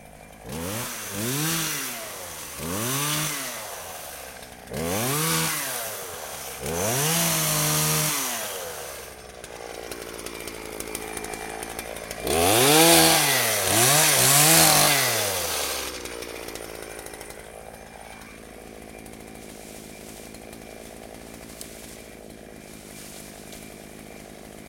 chainsaw sawing nearby4
chainsaw, nearby, sawing